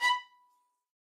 One-shot from Versilian Studios Chamber Orchestra 2: Community Edition sampling project.
Instrument family: Strings
Instrument: Viola Section
Articulation: spiccato
Note: B5
Midi note: 83
Midi velocity (center): 95
Microphone: 2x Rode NT1-A spaced pair, sE2200aII close
Performer: Brendan Klippel, Jenny Frantz, Dan Lay, Gerson Martinez
b5, midi-note-83, midi-velocity-95, multisample, single-note, spiccato, strings, viola, viola-section, vsco-2